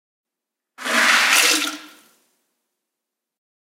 Pills into Toilet
~100 medium sized pills being dumped quickly from a plastic trash can into a average-sized bathroom toilet.